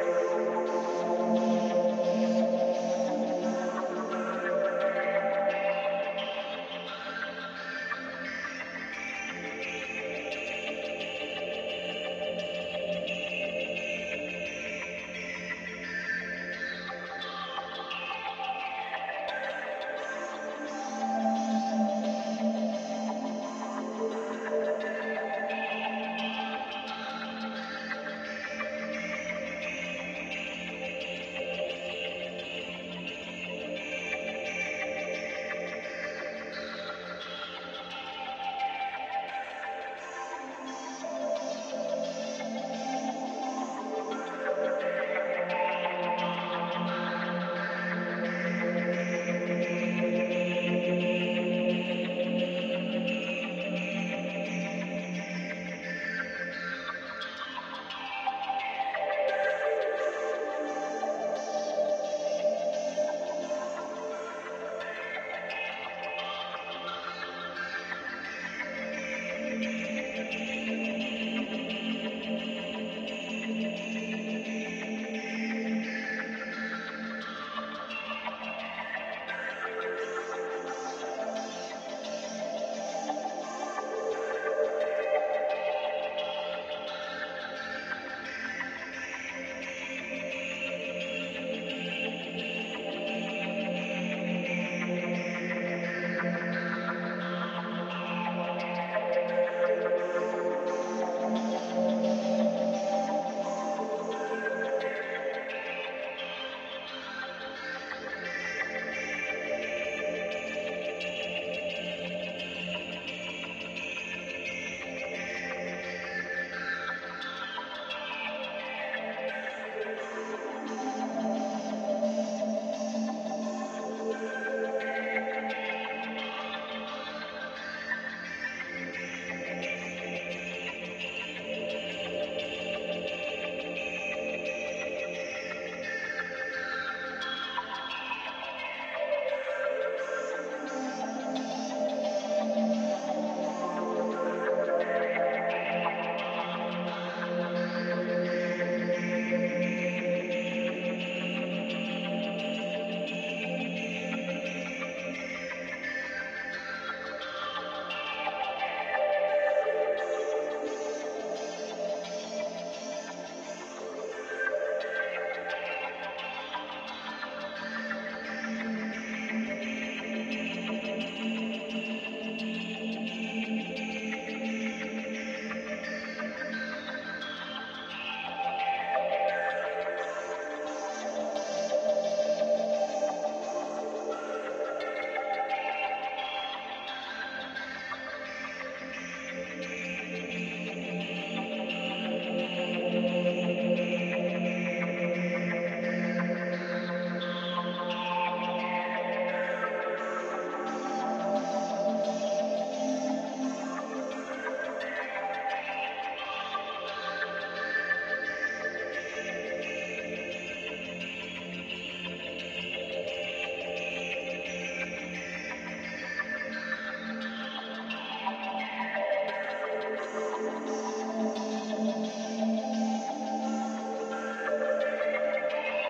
Fmaj-85-rythmpad1
Pad, created for my album "Life in the Troposphere".
pad, ambient